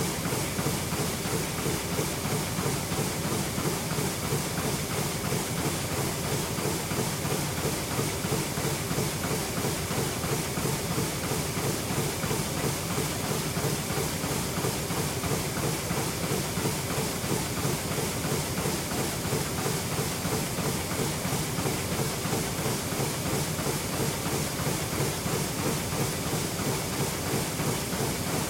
cardboard factory machine-007
some noisy mechanical recordings made in a carboard factory. NTG3 into a SoundDevices 332 to a microtrack2.